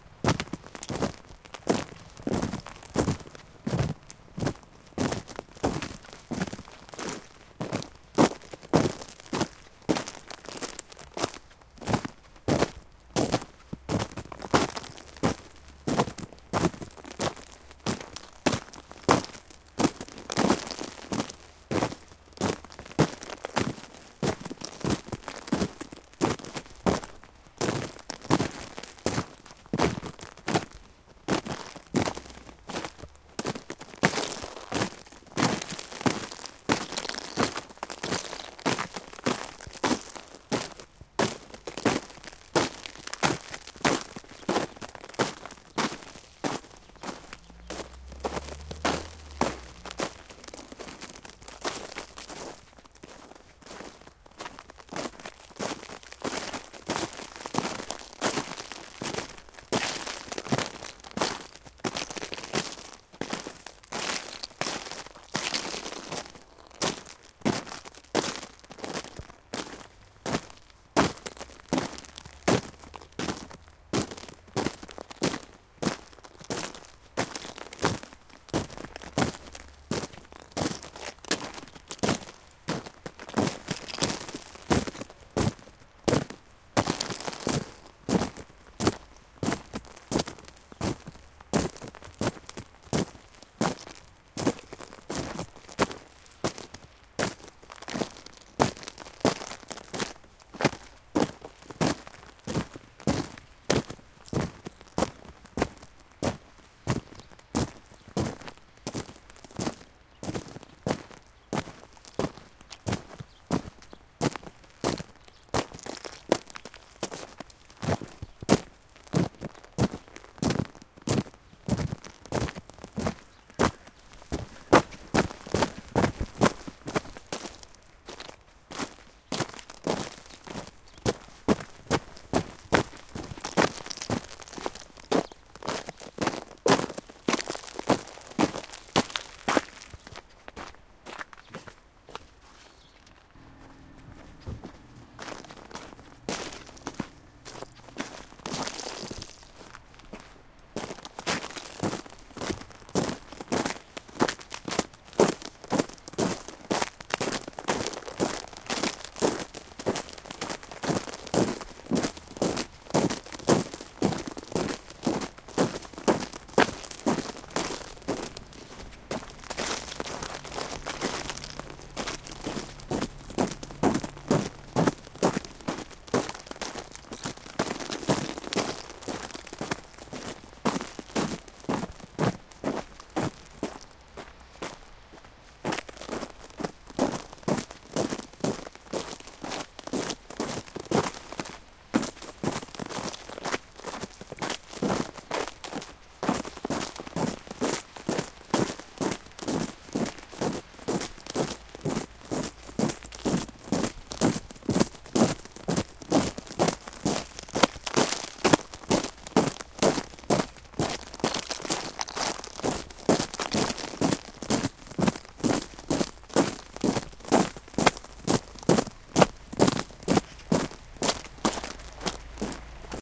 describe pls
Walking on snow frozen to ice 24.12.2012